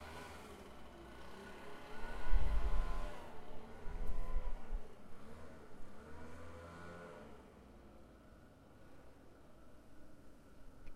Far Away Leaf Blower
Leaf blower in the distance, great to add to a classic neighborhood ambience
ambience, leaf, neighborhood, blower